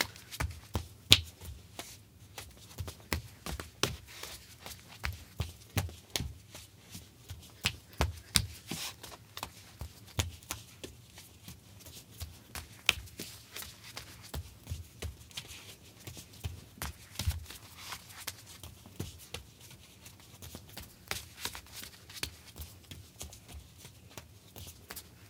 Footsteps, Solid Wood, Female Barefoot, Running
barefoot, female, footsteps, solid, wood